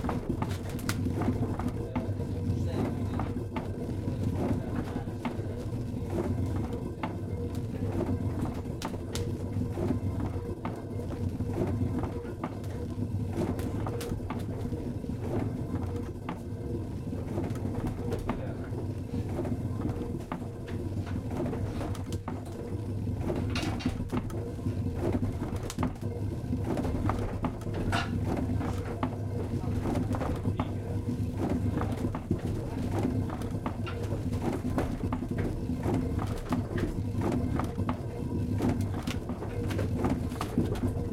Inside a traditional Dutch windmill, 2
This is the second recording in this pack from inside a traditional, working old Dutch windmill, called De Lelie - The Lily - in the picturesque Dutch village of Eenrum. This mill was used to grind grain. There was a strong northwesterly breeze straight from te sea, so the sails of the windmill were in full swing, this morning on Saturday may 14th.
The dominant sound you hear is the sound of the millstone. The “schuddebak” from the first recording in this pack was detached by the millers. You can also hear the squeaking sound of the mighty, big wooden wheels under the ridge of the roof.
This windmill is now a small museum. Admission is free, so everyone can admire this proud Dutch windmill heritage which reclaimed half our country from the sea and gave us our daily bread. Thanks to the millers fort heir hospitality and cooperation!
(I used an iPhone with a Zoom iq5)